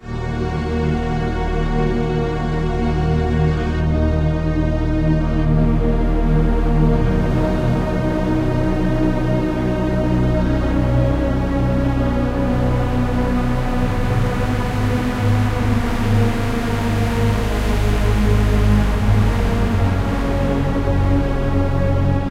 Trancer love
A very euphoric emotional piece. Used Vanguard and some 3xOSC
euphoric, strings, melodic, pad, trance, emotion, saw